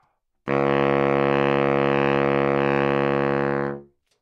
Sax Baritone - C#3
Part of the Good-sounds dataset of monophonic instrumental sounds.
instrument::sax_baritone
note::C#
octave::3
midi note::37
good-sounds-id::5528